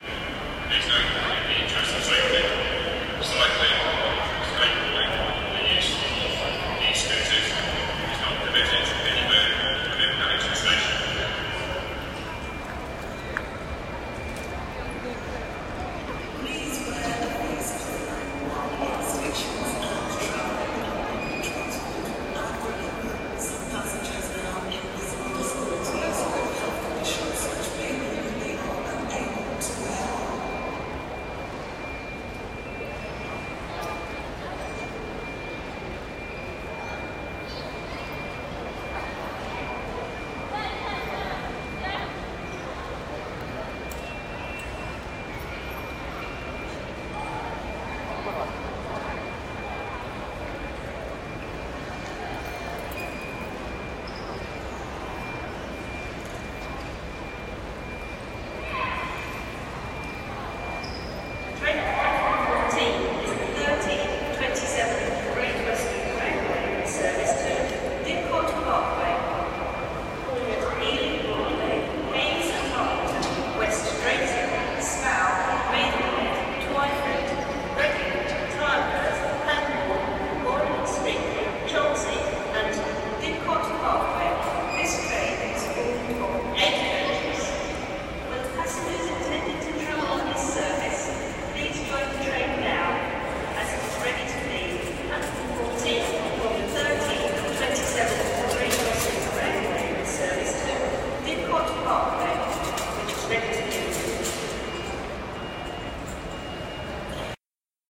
Paddington train station ambience
Ambient noise from Paddington train station, London. Featuring safety announcement, mask mandate announcement and train announcement. Recorded on iPhone 8. Edited with Audacity and ProTools
crowd, station, paddington, London, ambience, announcement, train, field-recording